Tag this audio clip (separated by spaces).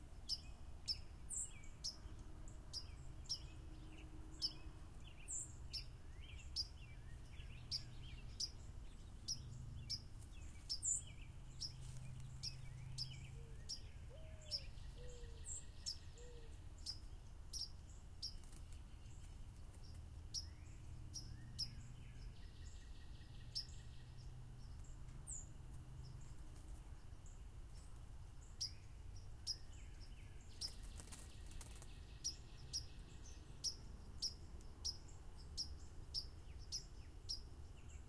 evening chip cardinal sing tweet bird cardinalis-cardinalis